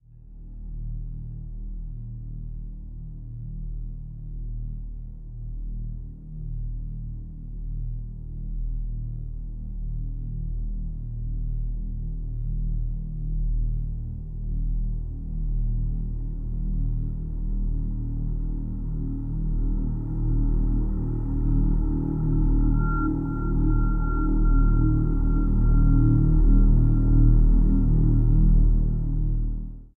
low tone final
oh the horror. good and slow, like real life. made for class.
sharpen, slow